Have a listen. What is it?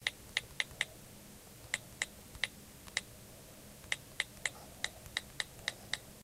A sound effect of typing on an iPhone